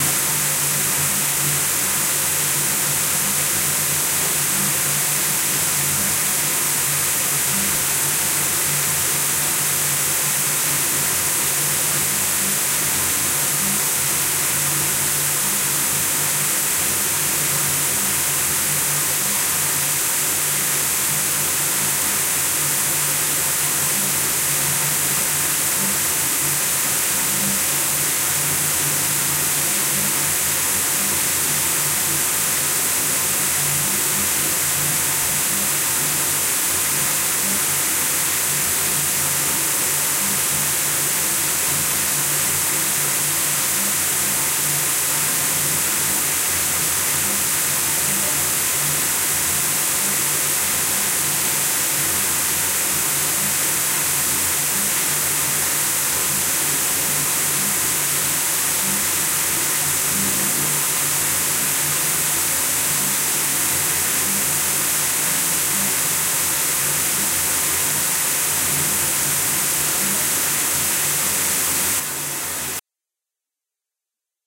Water running down the bath tub (hard)
Water running down the bath tub, hard intensity...
bath, bathroom, bathtub, field, field-recording, hard, intensity, pouring, recording, stereo, tub, water